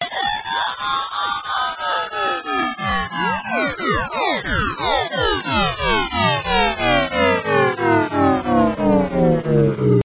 Tono de llamada de móvil
alert, call, cell, cellphone, message, mobile, phone, ring, UEM